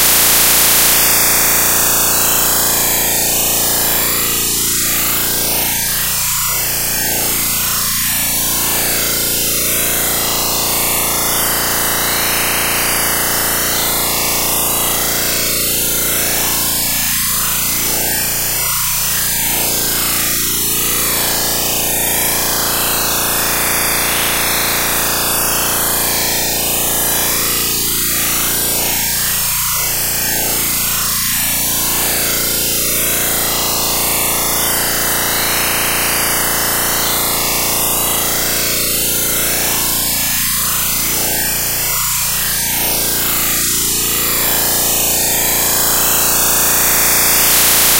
Torture Machine
construction, hammer, jack-hammer, noise, painful, painful-sound, scifi, torture